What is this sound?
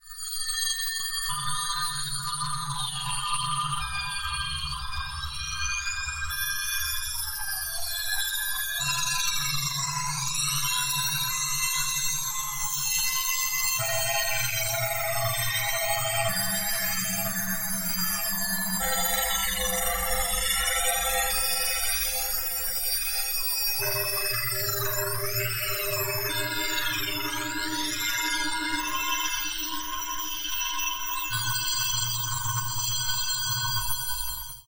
Strange - Supercollider
made by supercollider
fx, Sci-fi, supercollider